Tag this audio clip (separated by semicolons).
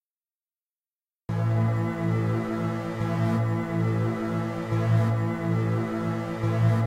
140-bpm; dubstep